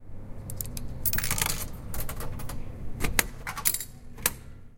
Coin Drink Vending
Metalic sound of the coin when we insert it into a drink vending machine situated in 'Tallers' area.
campus-upf, coin, drink-vending, machine, UPF-CS14, vending-machine